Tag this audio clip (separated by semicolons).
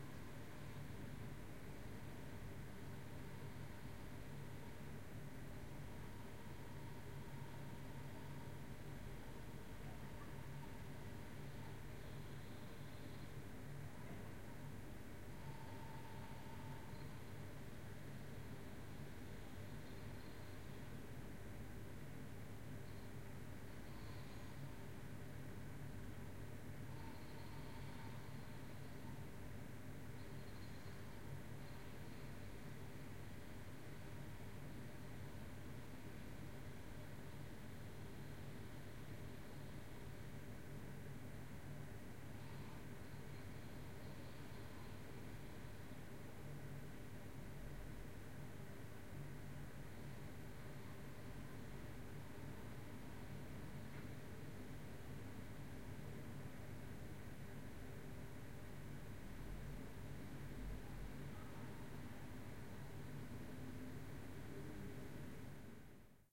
Distant; Office; Quiet; Room; Tone; Traffic